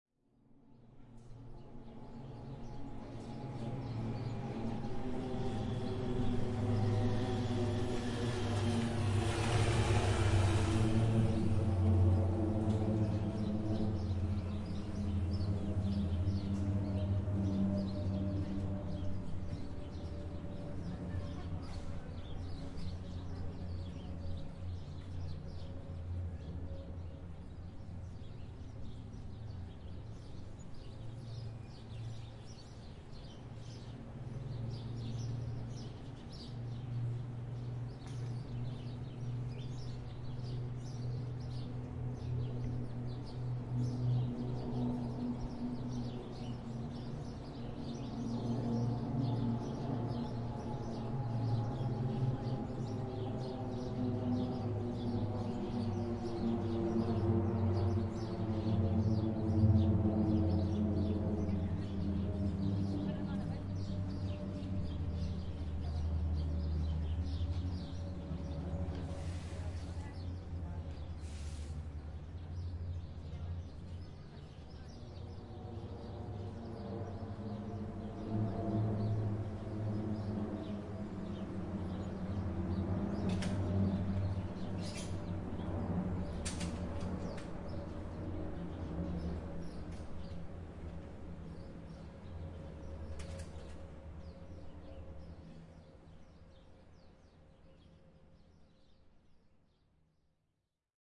Military Aircrafts Over Mexico City
Military Aircrafts Parade Whit Birds.